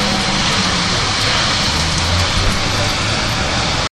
Behind the arcade on 12th Street in Ocean City recorded with DS-40 and edited and Wavoaur.